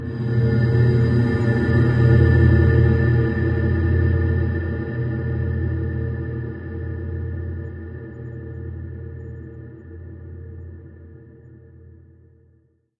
Icy space atmosphere. Reminds me of the sound of Steve Roach or Vidna Obmana. This sample was created using the Reaktor ensemble Metaphysical Function from Native Instruments. It was further edited (fades, transposed, pitch bended, ...) within Cubase SX and processed using two reverb VST effects: a convolution reverb (the freeware SIR) with impulses from Spirit Canyon Audio and a conventional digital reverb from my TC Electronic Powercore Firewire (ClassicVerb). At last the sample was normalised.
deep-space, drone